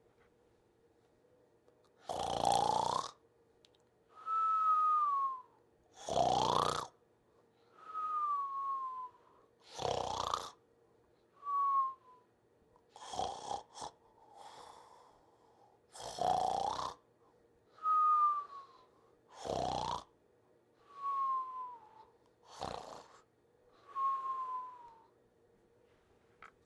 Very slow snore sound of a cartoon character.